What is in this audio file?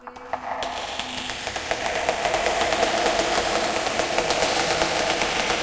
reverberacion-drum
sound,drum,dance
dance, sound-drum, percs, garbage, improvised, rubbish